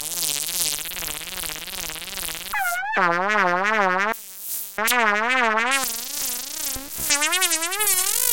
2-bar loop that rises in pitch as it flutters and skitters and pans; made with Audiomulch and Adobe Audition
pitched, electronic, loop, skittery, 2-bar, industrial, sound-design